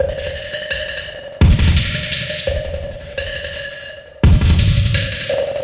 Glitch Drum loop 4c - 2 bars 85 bpm
Loop without tail so you can loop it and cut as much as you want.